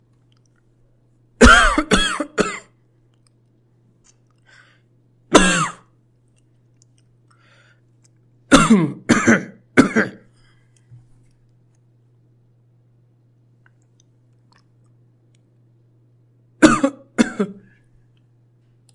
MALE COUGH
toser, tos